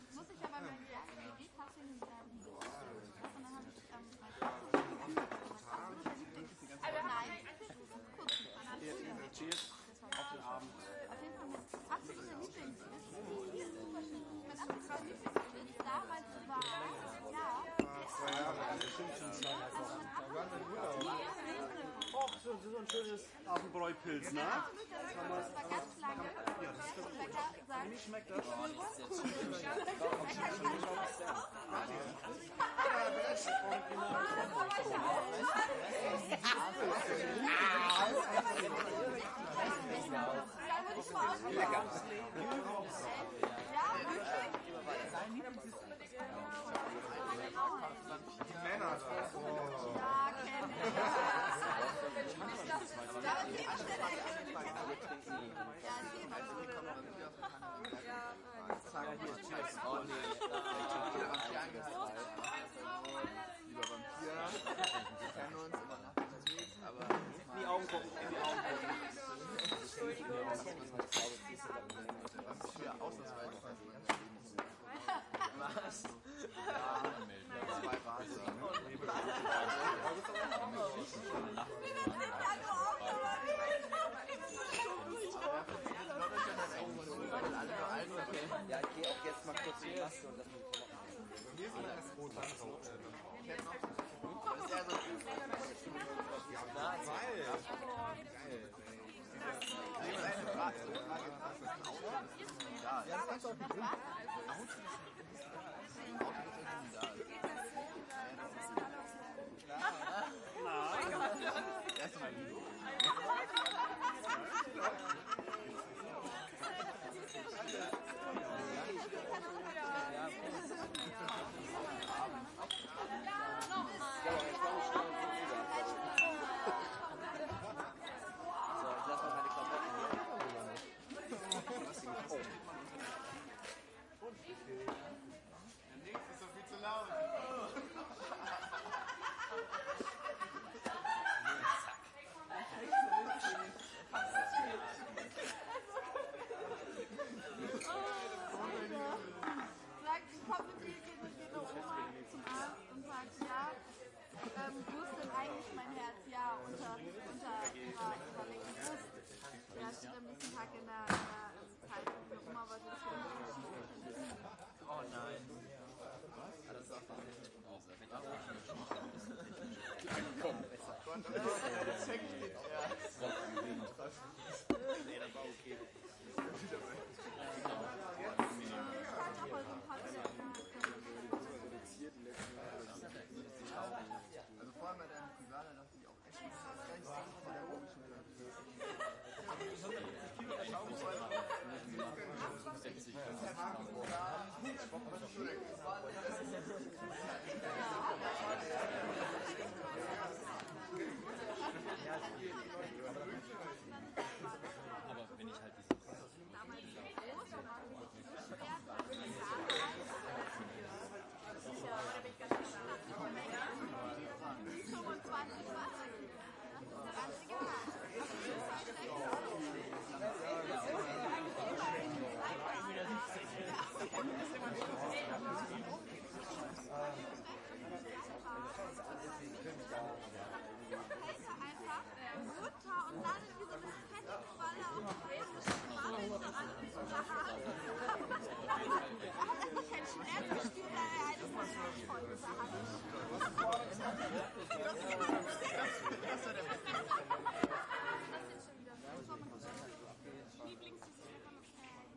Party-People outdoor 01 (german)
"Walla" recording of people talking in the background for a party scene set outdoors on patio behind a club (Molotow, Hamburg(DE)). People talk, laugh, toast each other, set drinks on the tables, etc.
Recorded with a couple of MKE2 mics on AVX wireless systems at the tables where people where placed - sources are panned hard left/right for stable imaging even outside the sweetspot and leave place in the center for dialogue etc.
Recorded with MKE2 mics on AVX systems on a SD664.
patio, outdoor, EXT, ambience, talking, german, conversations, crowd, loud, AT, lively, party, human, people, drinks, people-talking, happy, bar, nightclub, toasting, Walla